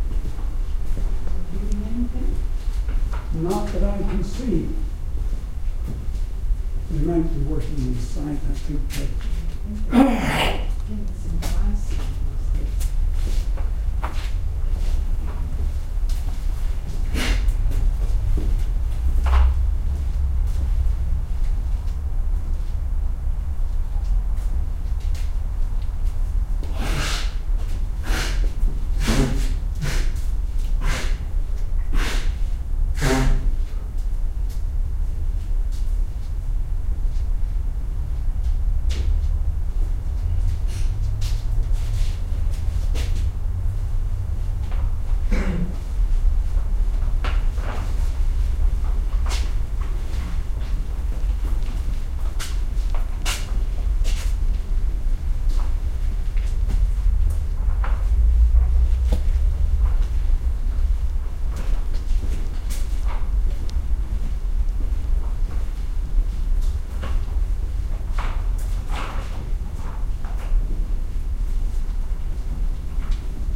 Allen Gardens Old Man Sneeze
allen, gardens, man, old, sneeze, toronto